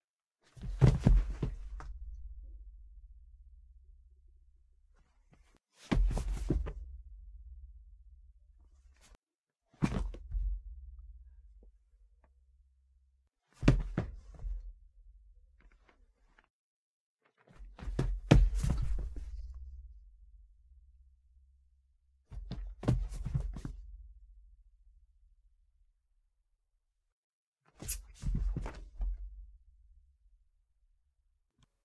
body falling down
some falldowns of a human or heavy thing on the floor of a room. the last one includes a squeaky noise.
body, fall-down, falling, floor, hit, kill, stumble, trip